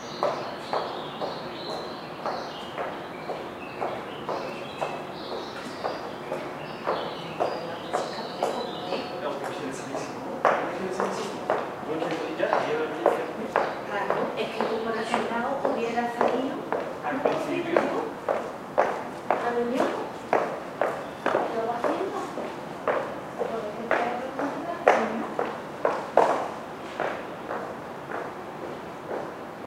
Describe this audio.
a woman in heels walks below my balcony
city, field-recording, people, walking